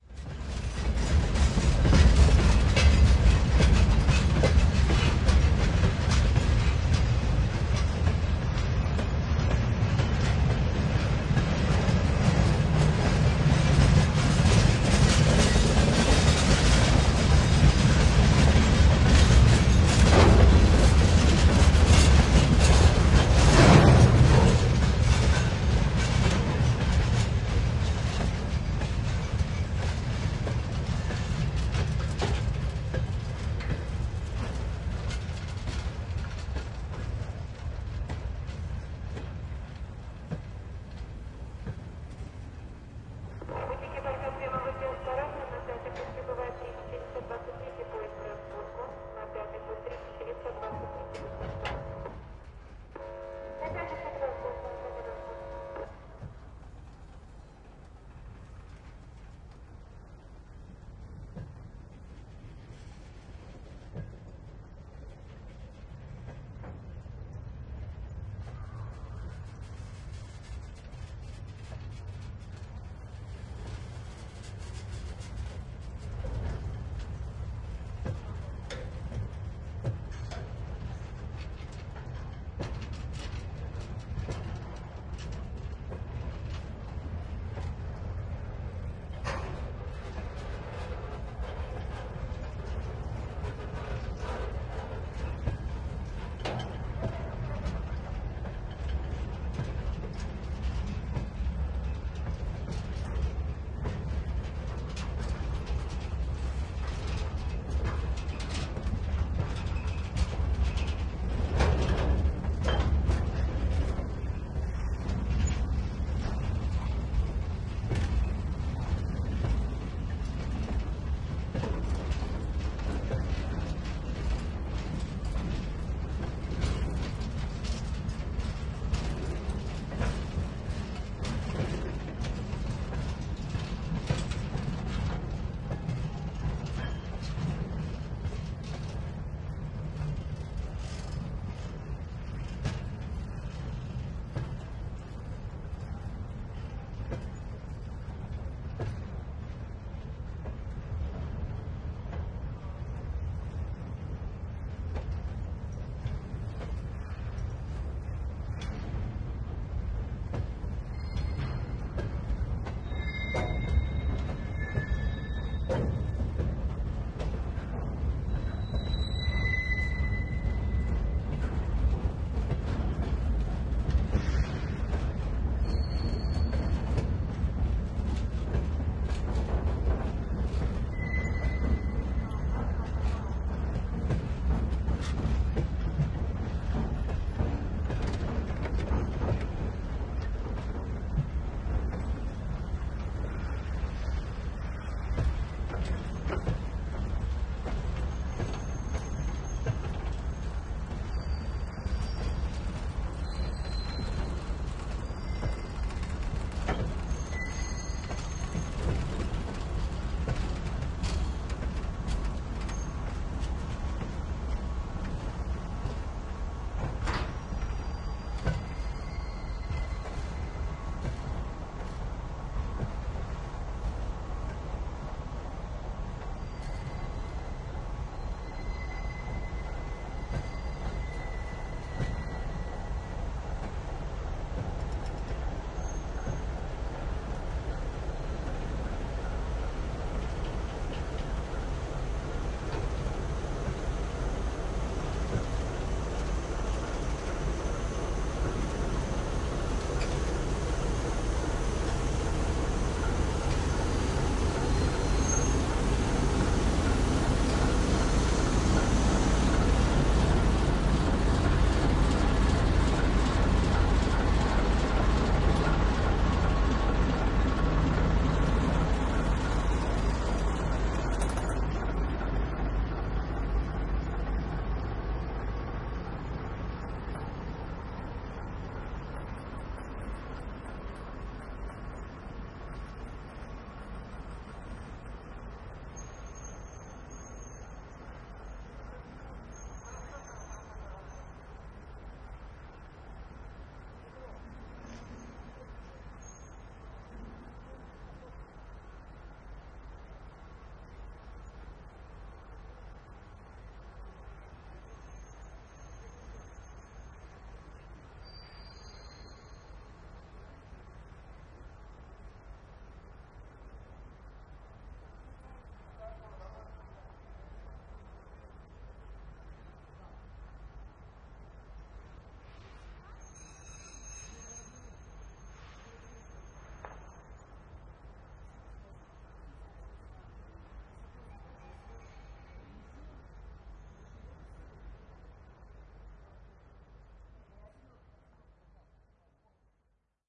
cl yard ambience train pass by
the train passes slowly and brakes on classification yard